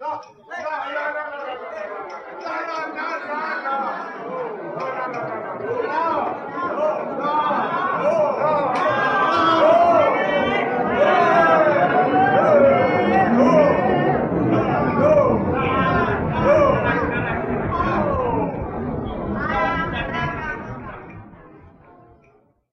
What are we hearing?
original "La Plaga Theater Ensamble" recording slightly processed
recording, voices